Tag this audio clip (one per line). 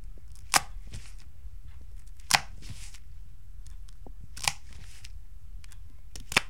GARCIA
goo
live-recording
Mus-152
putty
SAC
slime